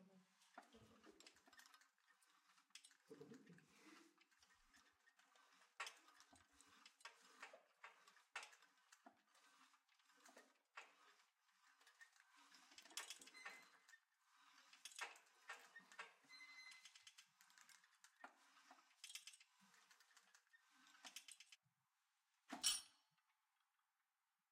weights rope
gym, machine, rope, weights